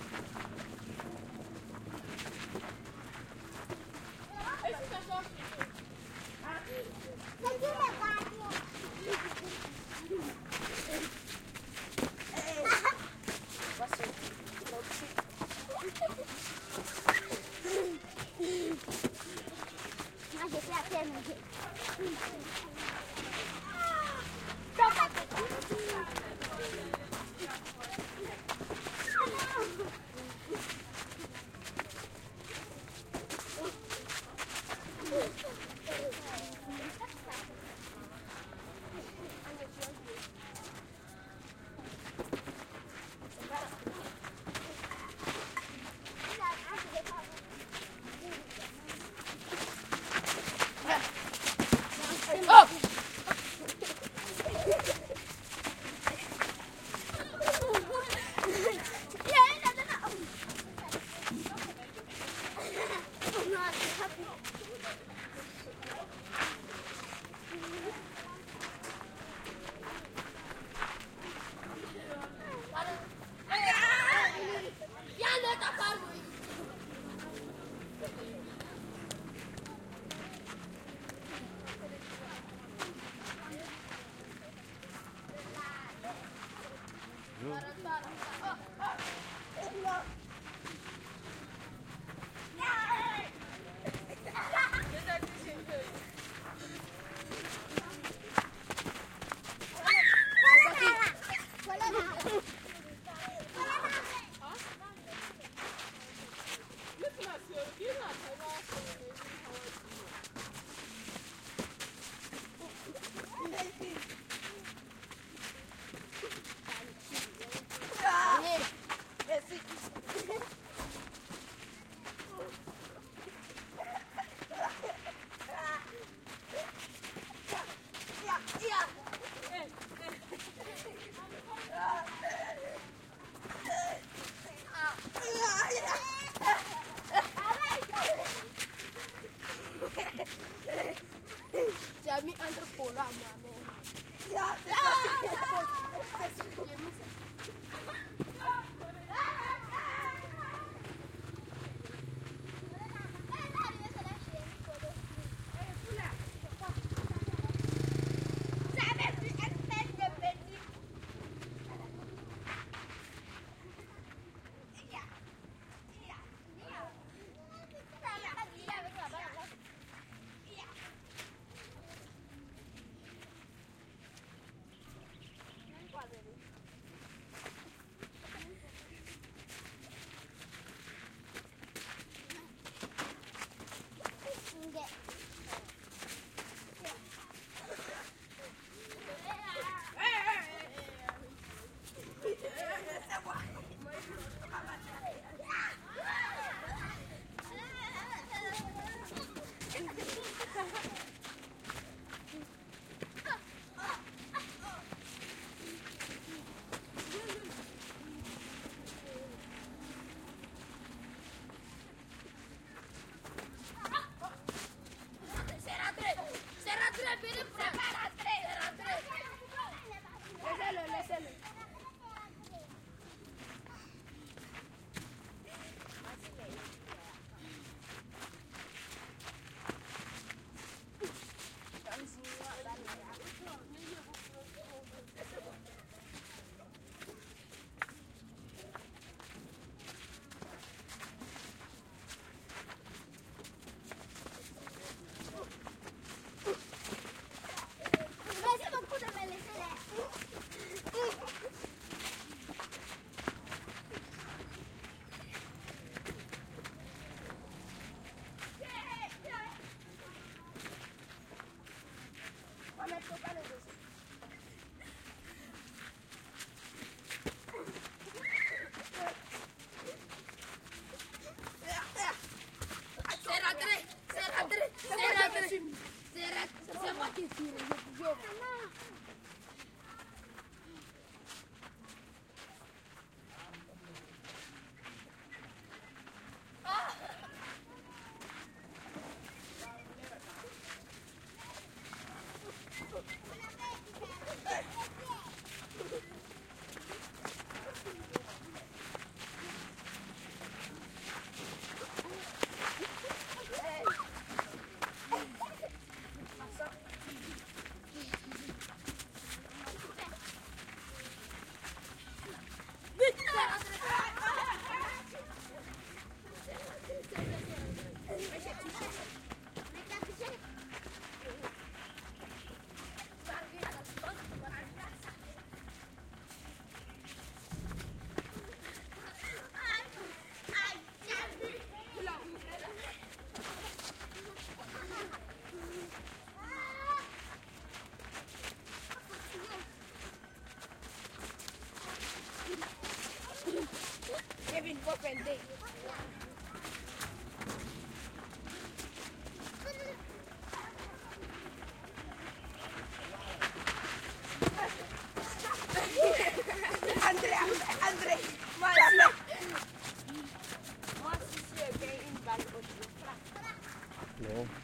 Burkina Ouagadougou Children playing football in the street
Year 2005
recorder sounddevives 744T
mics Stéréo AB ortf Neuman KM 140